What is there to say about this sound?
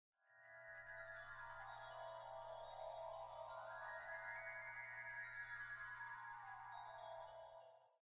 Tilting - vertigo
A sound to describe tilting of one's universe, vertigo, maybe nausea, confusion
vertigo, tilt, imbalance, tilting, nausea, fall, confusion